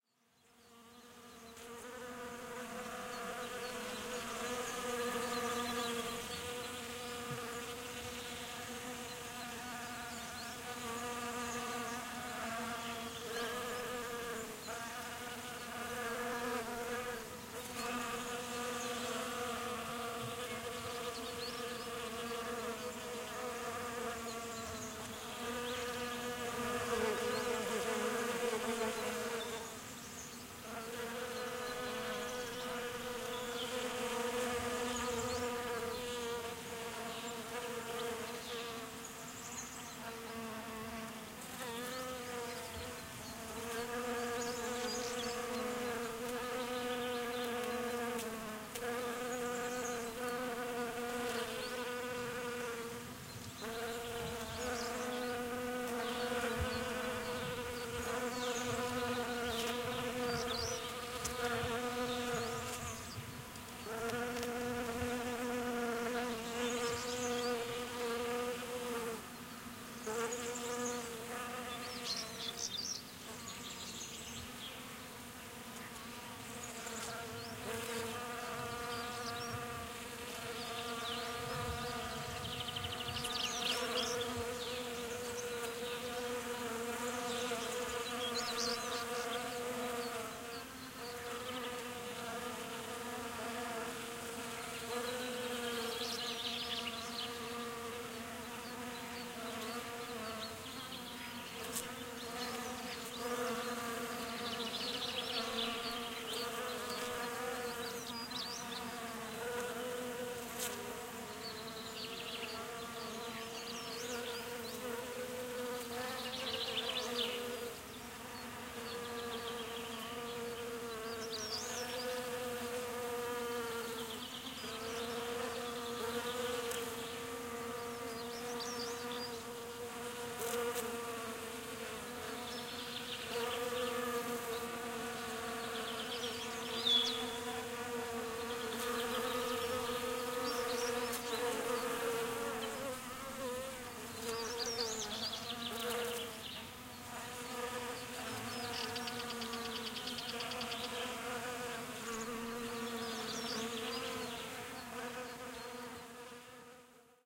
Buzzing insects (mostly honey bees) foraging at the flowers of a Rosemary shrub, birds singing in background. Recorded near Arroyo de Rivetehilos (Donana National Park, S Spain) using Audiotechnica BP4025 > Shure FP24 preamp > Tascam DR-60D MkII recorder